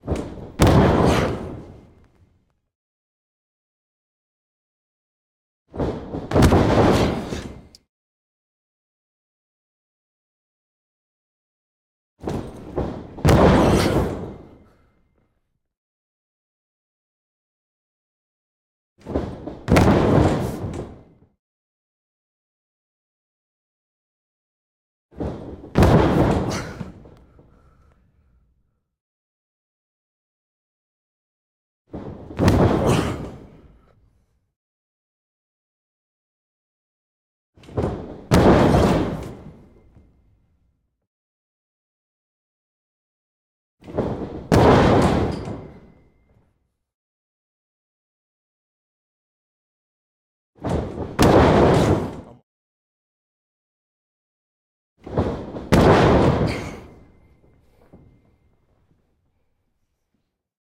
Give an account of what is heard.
thud impact wrestling ring heavy wrestler fall on mat +breath exhale
fall, heavy, impact, mat, ring, thud, wrestler, wrestling